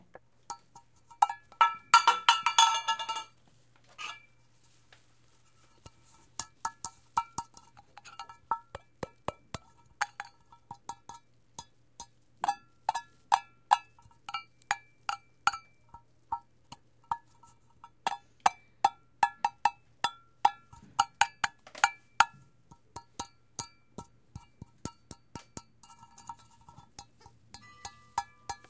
Mysound-IDES-FRsmall tin
A small tin of condensed milk is stroked and tapped delicately.